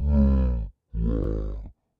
Deep Groan 3
Deep Groan Creature Monster
Monster, Deep, Creature, Groan